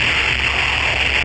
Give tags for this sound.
Alien; Electronic; Machines

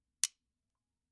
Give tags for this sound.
FX; Domestic; Fork; Metallic; Hit; Pan; Spoon; Kitchen; Handle; Percussion; Wooden; Knife; Rhythm; Metal; Saucepan

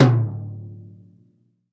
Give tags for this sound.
custom; cymbals; snare; one-shot; cymbal; crash; metronome; bronze; NAMM; one; ride; bubinga; drumset; Bosphorus; Cooper; Istambul

